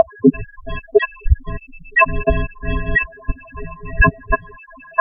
Created by drawing patterns in windows Paint & imported into Bitmaps&Wavs;.